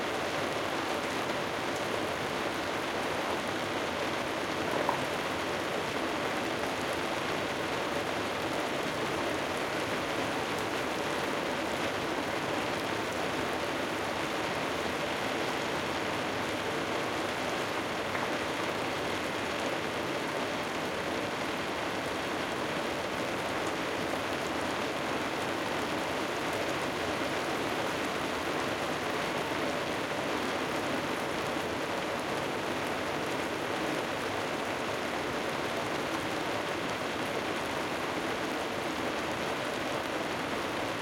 Close perspective of rain on window recorded from inside a reverberant room. It's a nice false rain pouring during a shooting but sometime we hear drips from outside.